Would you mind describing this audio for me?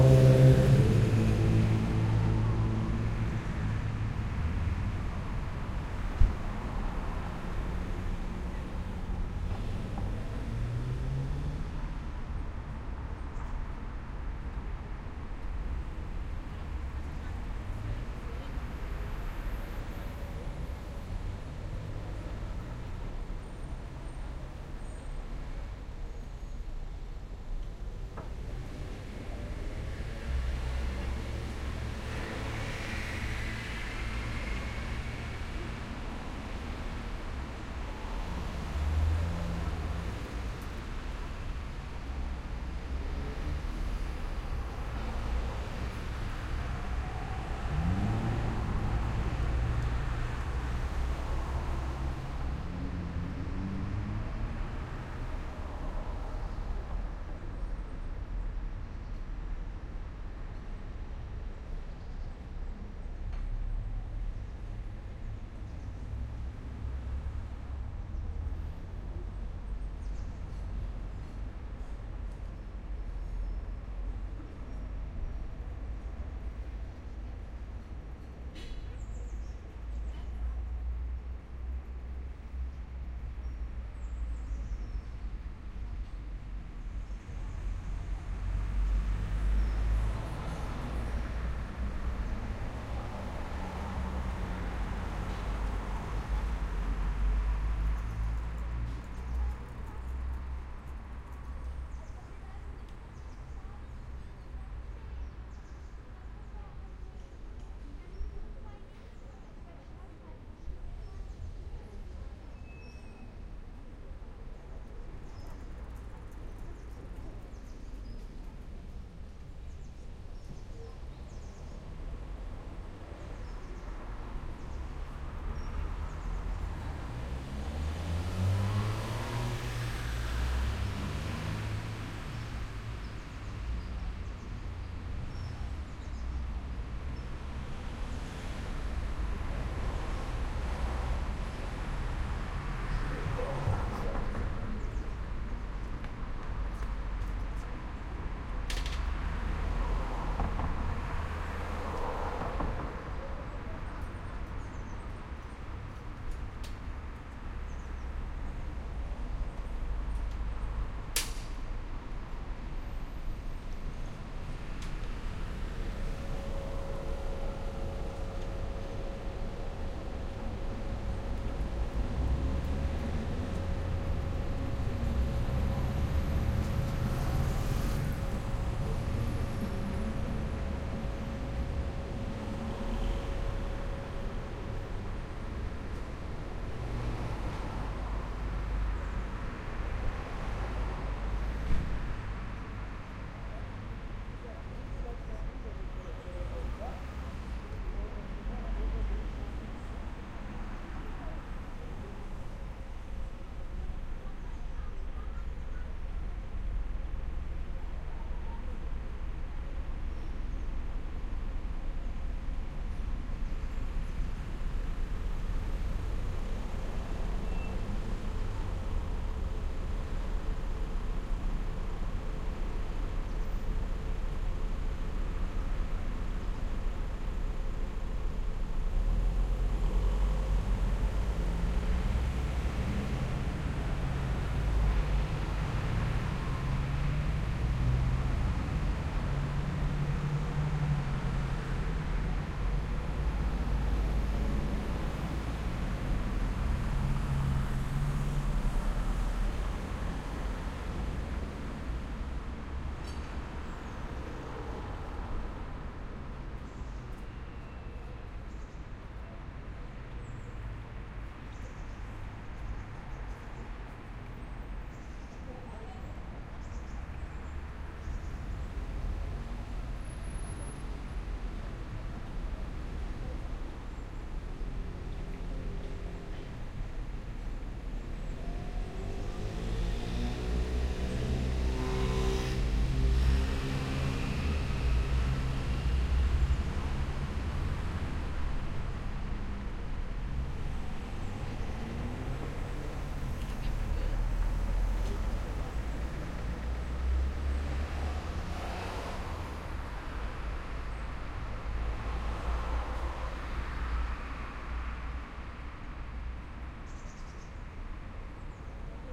Please don´t ask me, what the strange sound in the beginning is. I have no idea! Field-recording of some traffic on a busy road in town. Primo EM 172 microphones into Sony PCM-D50.
crossroads, cars, field-recording, strange, traffic